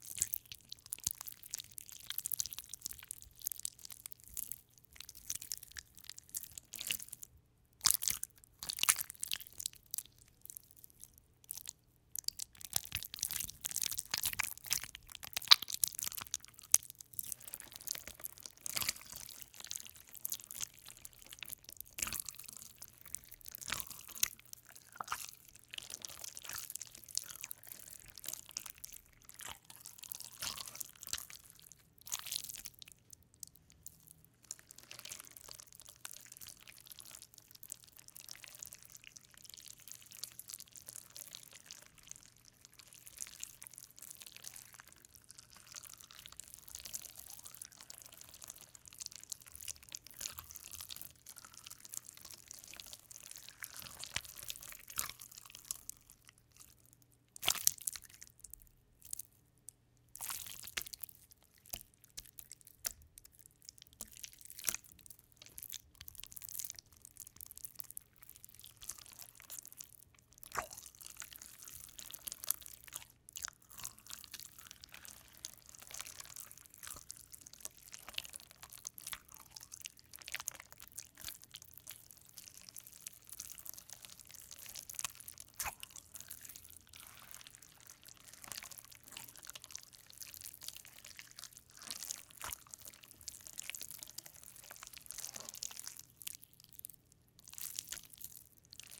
Guck, Slime, slippery Jelly slowly kneading squeezing with Hands, some Bubbles
Slime, Guck, Jelly